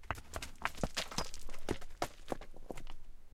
Stein Aufschlag mit langem Decay 09
Recorded originally in M-S at the lake of "Kloental", Switzerland. Stones of various sizes, sliding, falling or bouncing on rocks. Dry sound, no ambient noise.
stone, boulders, sliding, hit, movement, debris, close-miking, fall, nature, bouncing